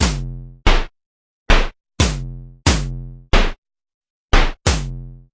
90 bpm ATTACK LOOP 3 snares mastered 16 bit
This is a component of a melodic drumloop created with the Waldorf Attack VSTi within Cubase SX. I used the Analog kit 1 preset to create this loop. Tempo is 90 BPM. Length is 4 measure. Mastering was done within Wavelab using TC and Elemental Audio plugins.
snare, electronic, 90bpm, loop